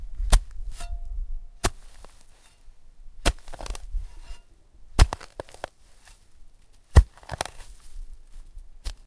Shovel dirt
Digging up some grass and dirt with a small shovel. Thudding and tearing grass roots sounds.
Recorded with a Zoom H1, no editing applied.
dig, digging, dirt, earth, ground, roots, shovel, shovelling, spade, tearing